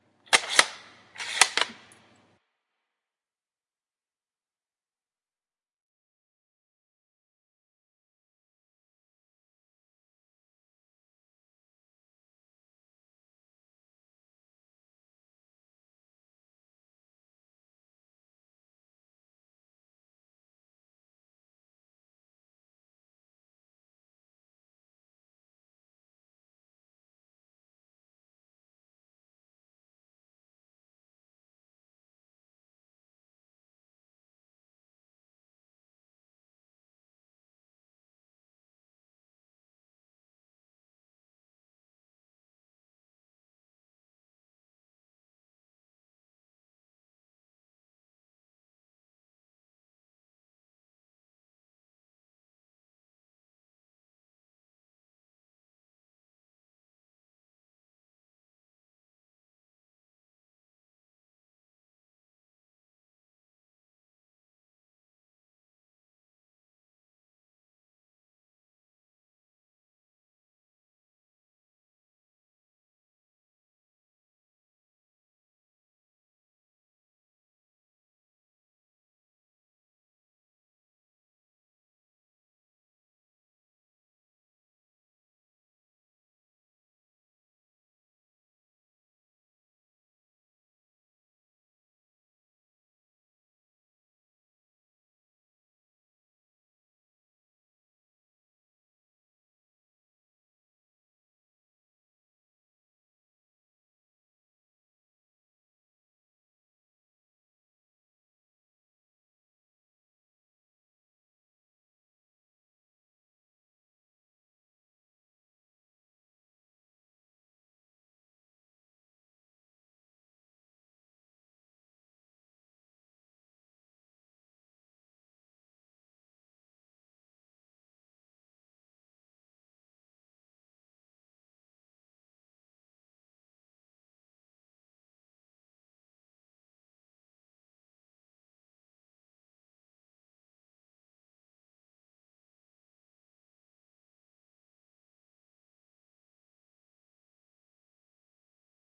A Remington 700's Bolt Being worked back and forth.